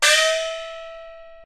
Recording of a single stroke played on the instrument Xiaoluo, a type of gong used in Beijing Opera percussion ensembles. Played by Ying Wan of the London Jing Kun Opera Association. Recorded by Mi Tian at the Centre for Digital Music, Queen Mary University of London, UK in September 2013 using an AKG C414 microphone under studio conditions. This example is a part of the "Xiaoluo" class of the training dataset used in [1].
beijing-opera, china, chinese, chinese-traditional, compmusic, gong, icassp2014-dataset, idiophone, peking-opera, percussion, qmul, xiaoluo-instrument